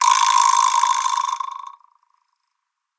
vibraslap large01
Large vibraslap single hit.
latin, percussion, quijada, rattle, special-effect, vibraslap